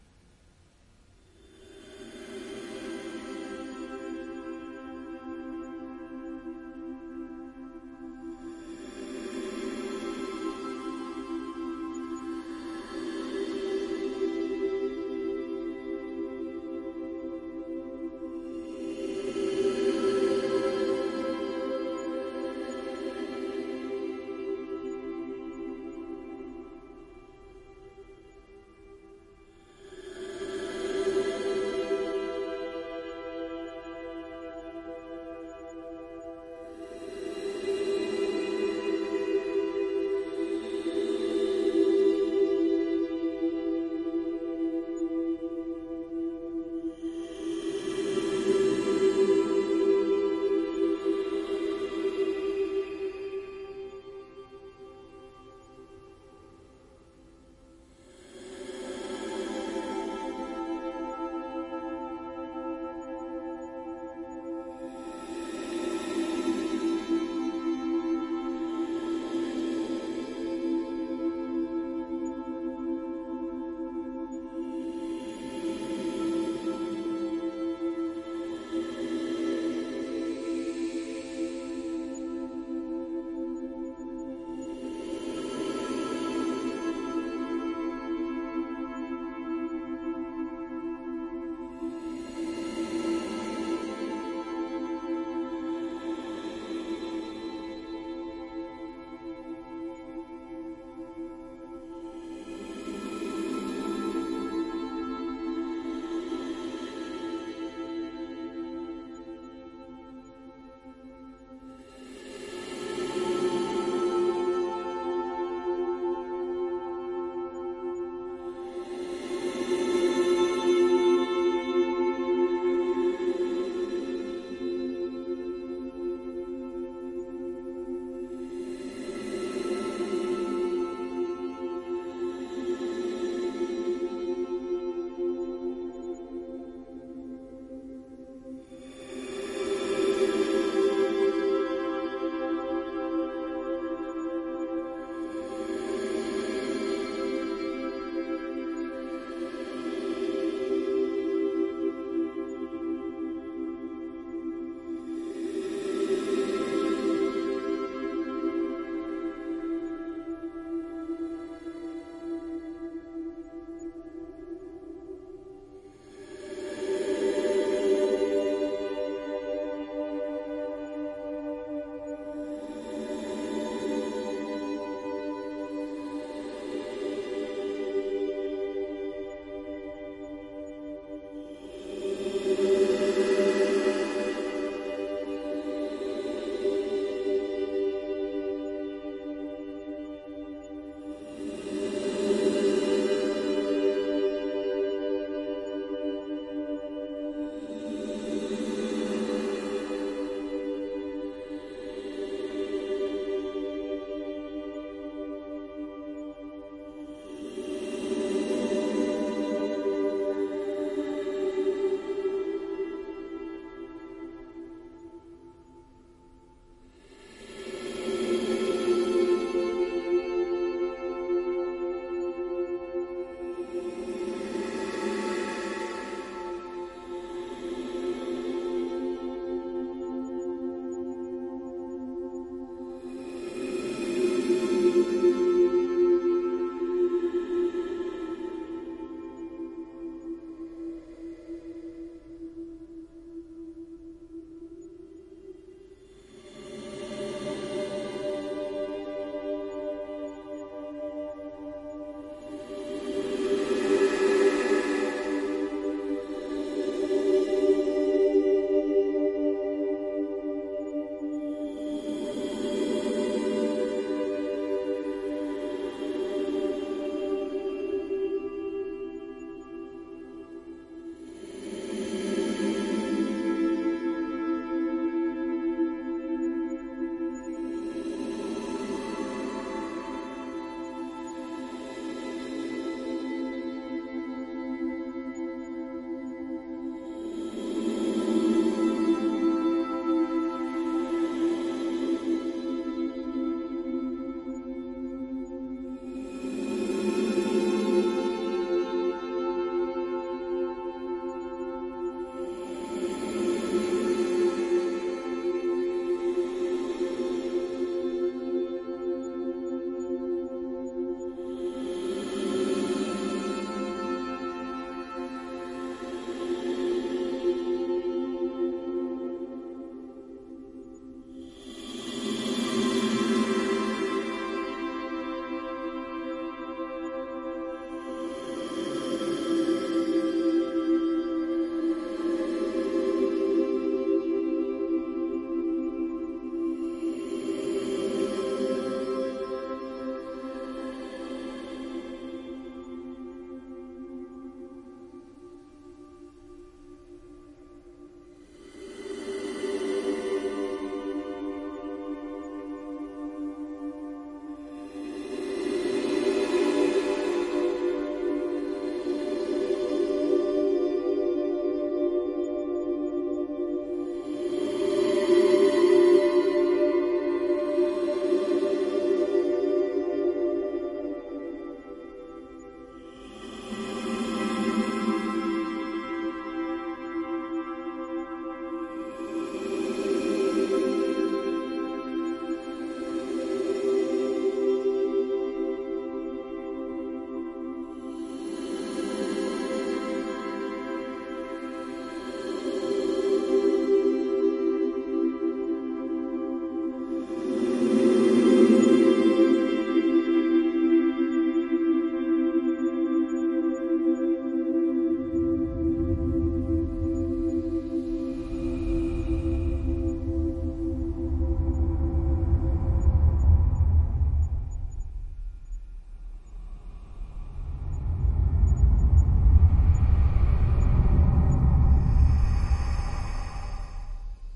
Ukelele Drone
Ambient sounds of a ukelele being played made with Paulstretching and EQ
drone, ukelele